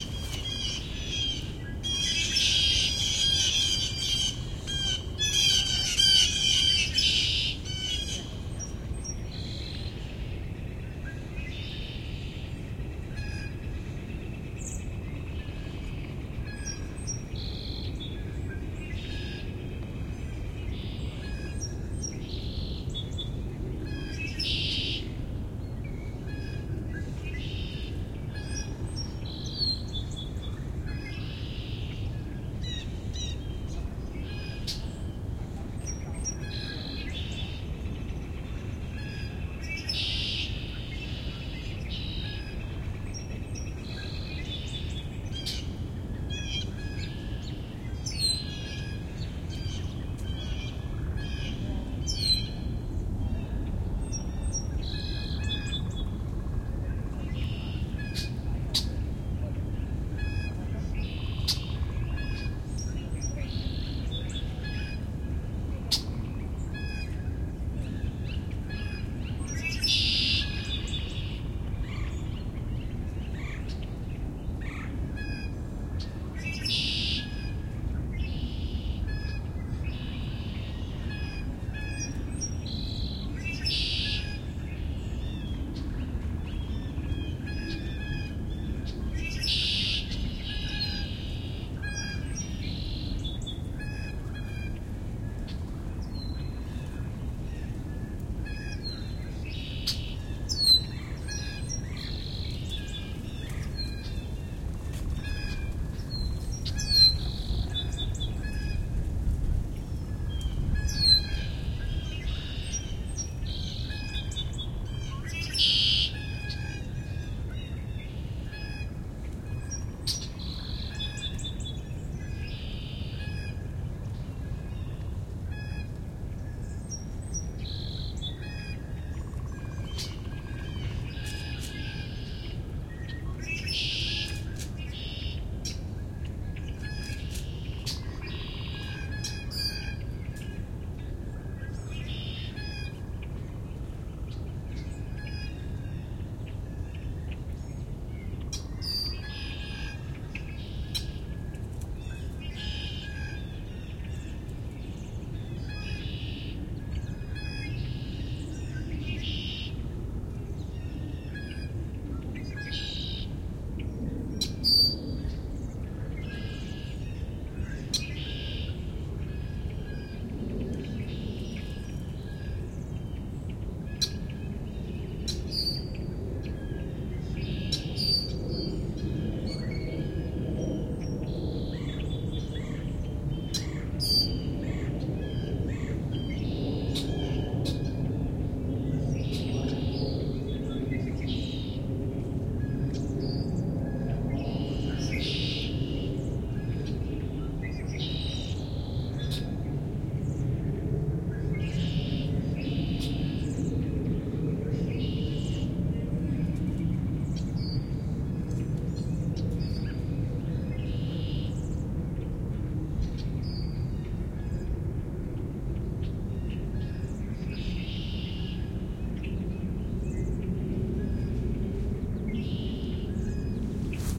2023 Spring Birds (raw)
Recorded at the side of a lake on easter 2023. You can hear the wind rustling through the trees. Some background noises are audible, including passersby and what sounds like a camera shutter. Birds heard: Blue Jay, Red-winged Blackbird, Song Sparrow, Northern Flicker, Red-Bellied Woodpecker, Northern Cardinal, American Robin, Blue-Grey Gnatcatcher.
Primo EM172 Mic Capsules -> Zoom XYH-5 X/Y Mic Attachment Line In -> Zoom F1 Audio Recorder
ambience, ambient, atmosphere, birds, field-recording, morning, Primo-EM172, spring, wind, woodpecker, zoom-f1